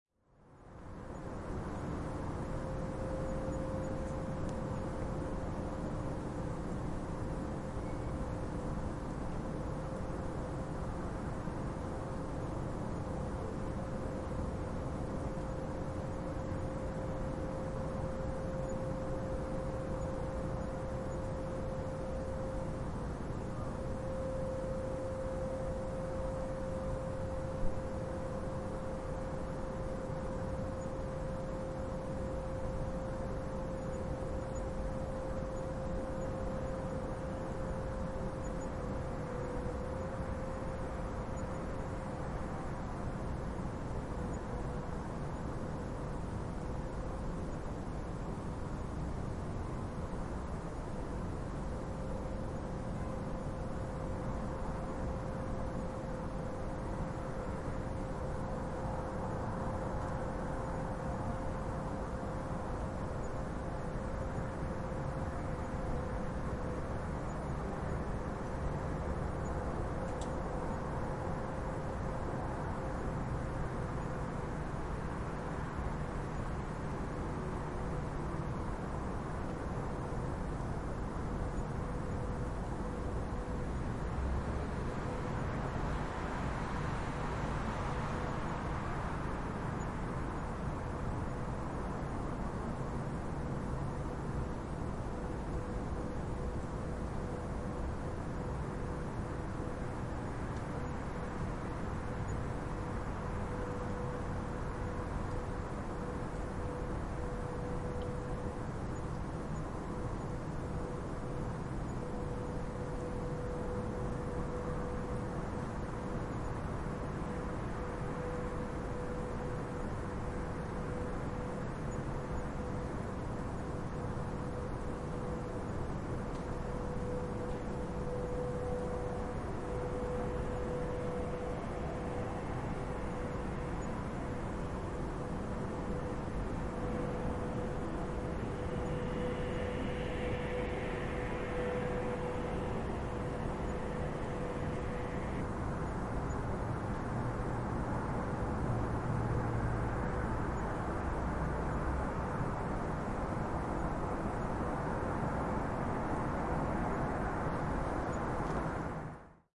Recording of city at night